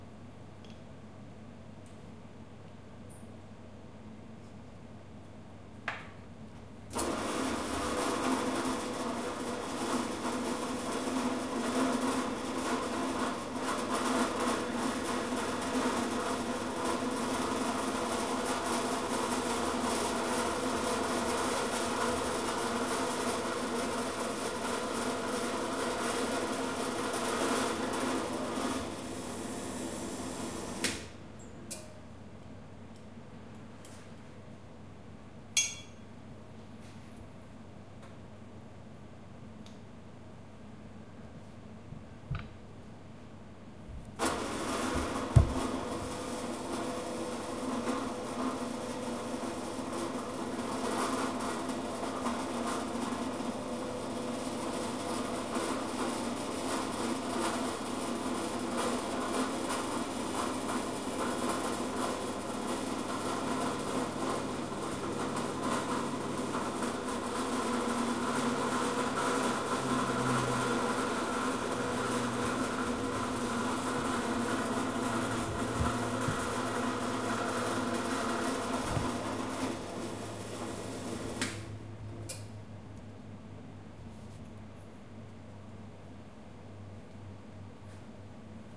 Workshop polishing machine
A machine used to polish and machine metal
buff, industrial, machine, machinery, mechanical, metal, polish, polishing, shed, tools, work, workshop